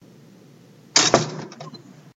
Door Closing 01
Wooden door closing.
Close, Closing, Door, Foley, Slam, Sound